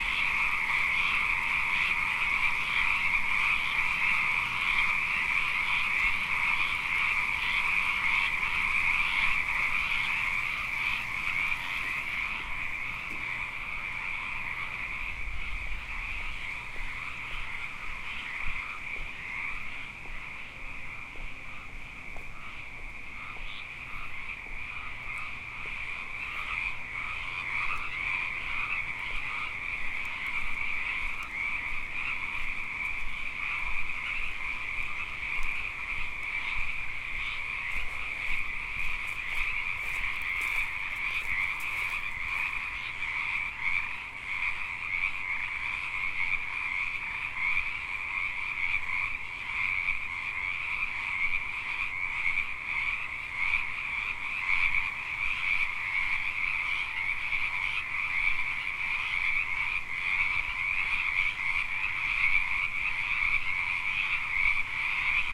A stereo recording of a chorus of frogs from various vantage points: first, above them on a footbridge; second, from the side of the stream.
ambiance, california, field-recording, frogs, mills-college, nature, night, oakland, ribbets